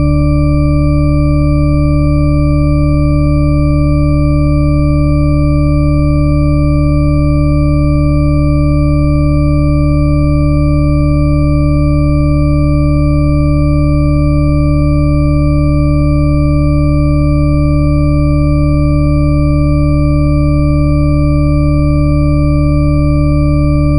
Shepard Note D
From Wikipedia:
"A Shepard tone, named after Roger Shepard (born 1929), is a sound consisting of a superposition of sine waves separated by octaves. When played with the base pitch of the tone moving upward or downward, it is referred to as the Shepard scale. This creates the auditory illusion of a tone that continually ascends or descends in pitch, yet which ultimately seems to get no higher or lower."
These samples use individual "Shepard notes", allowing you to play scales and melodies that sound like they're always increasing or decreasing in pitch as long as you want. But the effect will only work if used with all the samples in the "Shepard Note Samples" pack.
tone, singlenote, D, shepard-tone, note, illusion, sine-wave, sample, mono